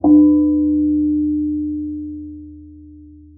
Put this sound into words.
A bell.
Recorded with an Alctron T 51 ST.
{"fr":"Cloche","desc":"Une cloche.","tags":"cloche dong"}
ding, bell, dong